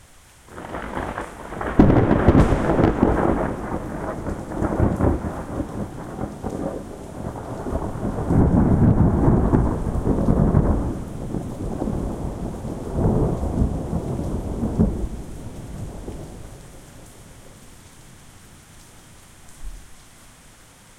This is a pack of the very best recordings of thunder I made through April and May of this year. Many very loud and impressive thunder cracks, sometimes peaking the capabilities of my Tascam DR-03. Lots of good bass rumbles as well, and, as I always mention with such recordings, the actual file is much better quality than the preview, and be sure you have good speakers or headphones when you listen to them.
bass, boom, cats-and-dogs, crack, deep, lightning, loud, pitter-patter, pour, rain, rumble, splash, thunder, water